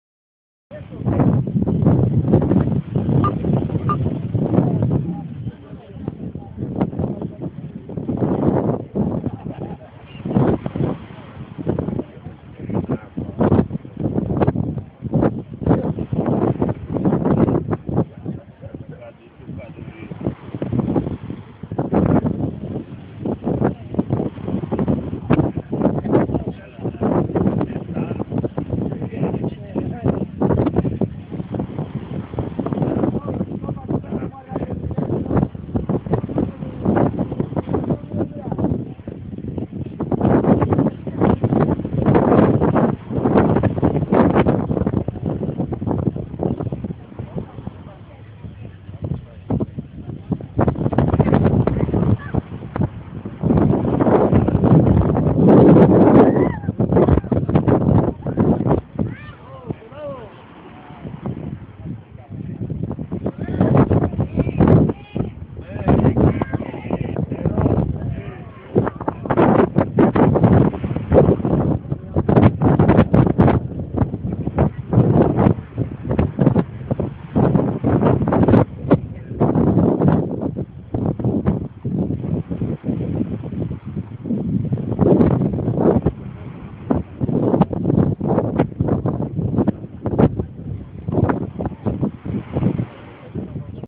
AUD-20140316-WA0001
I recorded this sound in Lloret de mar, a small town of Spain. It was a really windy day, I went to the beach to record the wind and the sea, because it was a bit stormy. You can also hear people, talking and laughing in the background. It was a really beautiful and sunny day!
breeze, laugh, sea, Spain, talk, voices, water, waves, wind